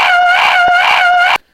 Flowers Like to Scream 16
noise, not-art, psycho, screaming, stupid, very-embarrassing-recordings, vocal, yelling